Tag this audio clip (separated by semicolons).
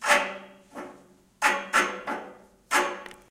Essen; Germany; School; SonicSnaps